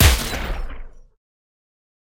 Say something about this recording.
SCI-FI Weapon Shot (Dry)
Sci-fi style gun shot. Not a laser.
sfx, scifi, shot, sounddesign, soundeffect, sound-design